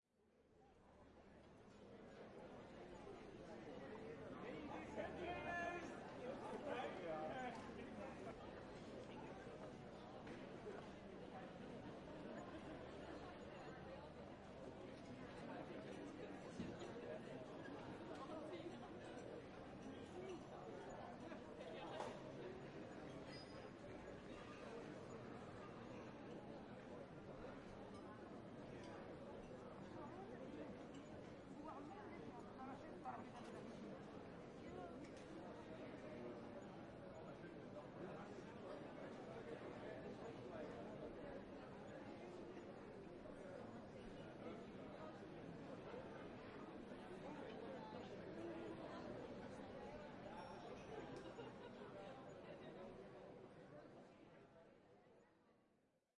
Amsterdam Atmos - de Pijp - Female & male chatter pretty busy, sounds padded @ a terrace, restaurant or bar, medium wide
Many students and workers (local Dutch and international) chat on a Friday night. Mostly female & higher male voices. Lively, always busy. Generation Y & Z. Recorded outside on a small square, surrounded by 3-story buildings. Sounds padded, filtered.